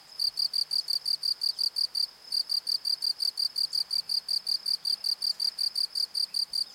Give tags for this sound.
bugs
crickets
insects
outdoors

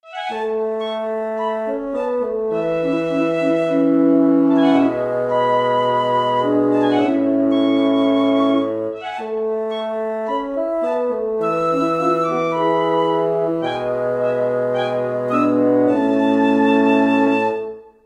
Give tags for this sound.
flute
classical
piccolo
innocent
story
harmless
bird
horn
music
friendly
motif
bassoon
small
fragment

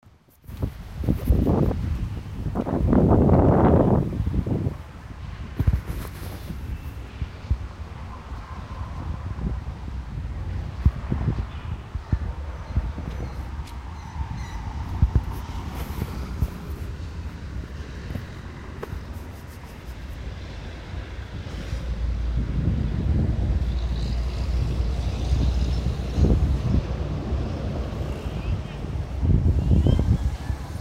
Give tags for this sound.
30
avendia
cra
lejania

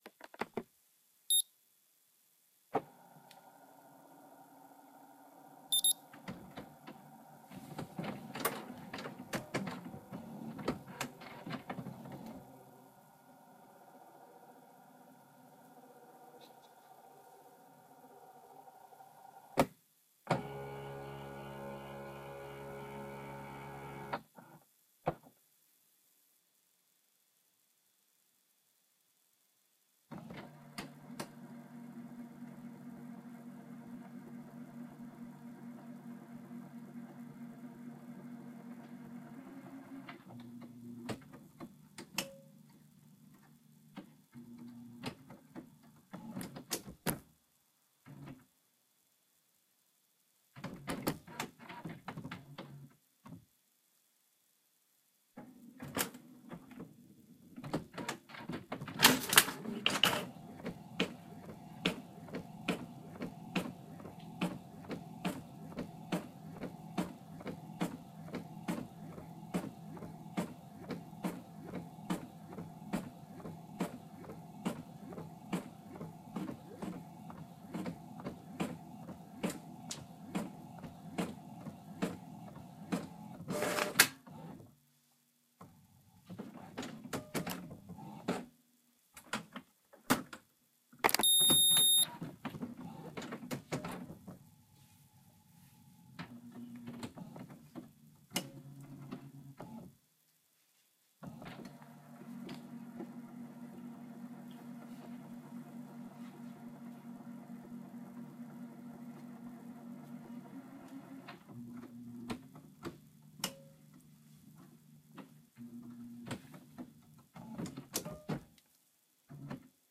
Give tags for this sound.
machine
printer
printing
motor
mechanical
computer
canon
robotic
print
robot
electrical
paper
beep
office

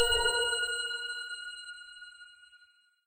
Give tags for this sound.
success
coin
win

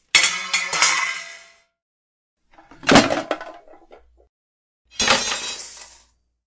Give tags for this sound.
table
fall
debris
tray
impact

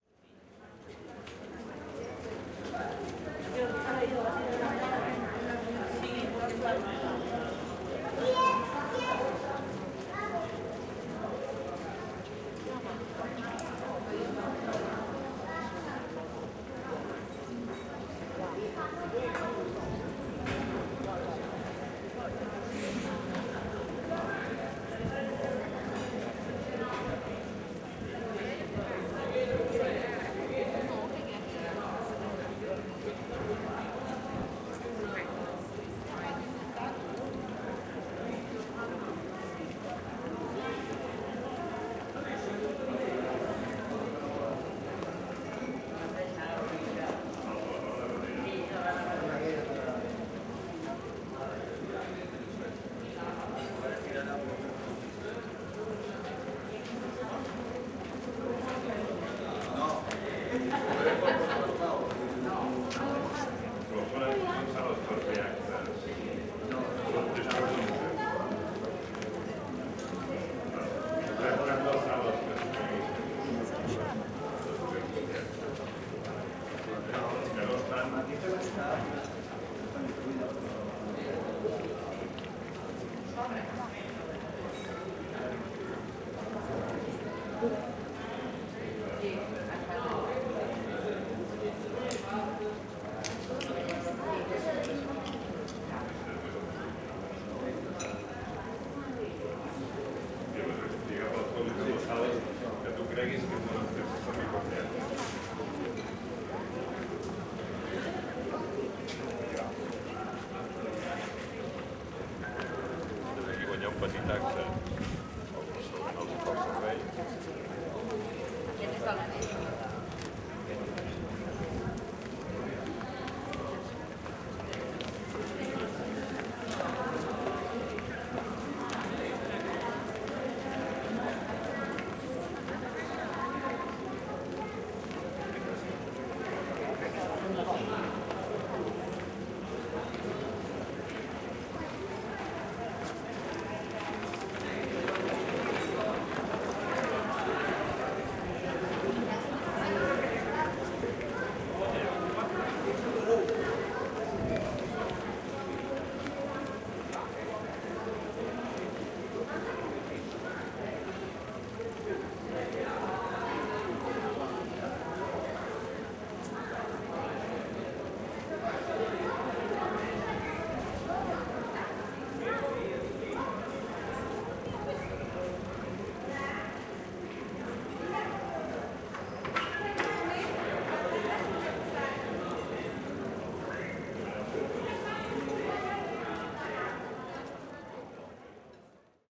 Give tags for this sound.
barcelona
barna
castle
montjuic

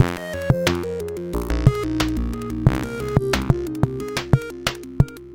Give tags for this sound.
delay
drumloop
VSTi
wavetable

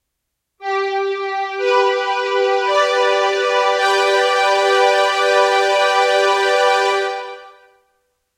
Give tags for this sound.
animation
blackout
fantasy
film
game
movie
video
video-game

